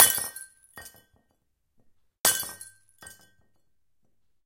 Breaking glass 5
A glass being dropped, breaking on impact.
Recorded with:
Zoom H4n op 120° XY Stereo setup
Octava MK-012 ORTF Stereo setup
The recordings are in this order.
breaking, falling, floor, glass, glasses, ortf, xy